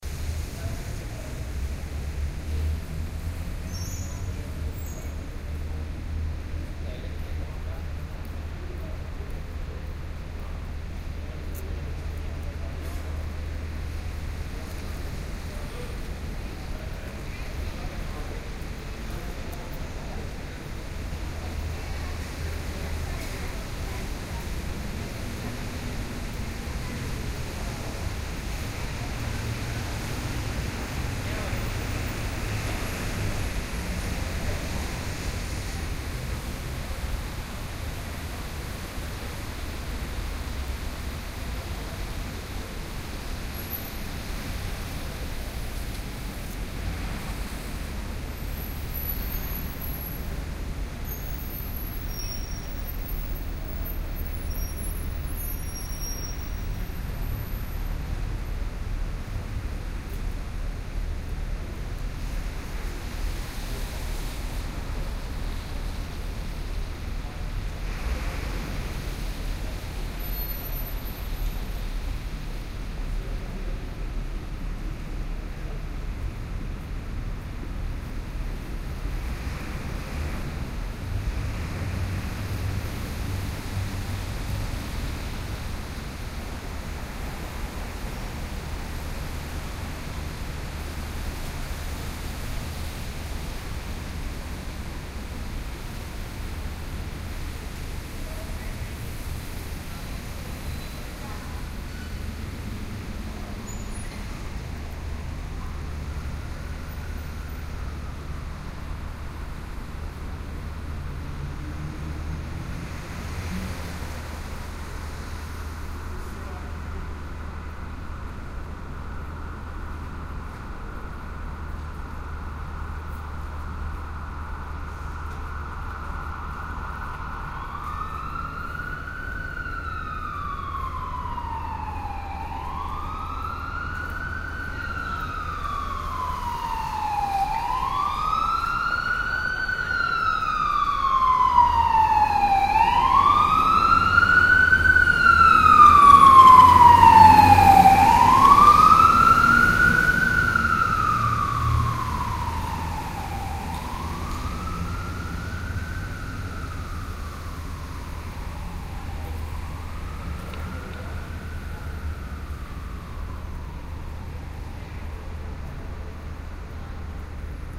Fire truck going by on a city street. Stereo binaural.
binaural, city, downtown, engine, fire, stereo, street, traffic, truck